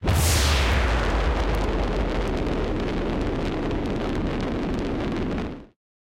Turbojet engine afterburner.
afterburner, fighter, plane, turbojet, airplane, jet, aircraft, engine